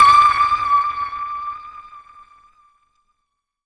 SONAR PING PONG D
The ping-pong ball sample was then manipulated and stretched in Melodyne giving a sound not dissimilar to a submarine's SONAR or ASDIC "ping". Final editing and interpolation of some notes was carried out in Cool Edit Pro.
250, asdic, atm, audio, ball, game, manipulated, media, melodic, melodyne, microphone, millennia, note, notes, percussive, ping, pong, preamp, processed, sample, scale, sonar, sport, table, technica, tennis, tuned